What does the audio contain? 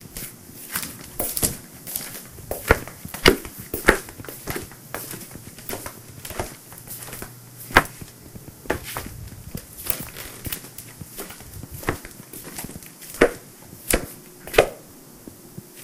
Wood Floor foot steps
Footsteps recorded indoors to recreate someone walking in a small cabin.
Created by :Allan Zepeda
Equipment:Olympus WS-600
step, stepping, woodfloor, footsteps, floors, walking, running